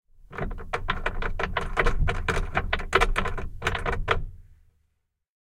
Making noises with a wooden closet, I think. Recorded in Stereo (XY) with Rode NT4 in Zoom H4.